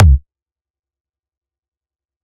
Free Kick drum made with drum synth
synthesized, drum, hit, kick, bass-drum, bd, drums, bassdrum